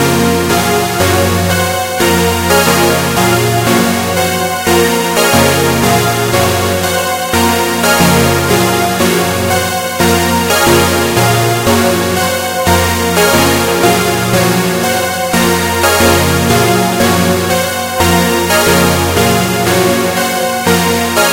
Trance Chords #2
Trance Chords in Serum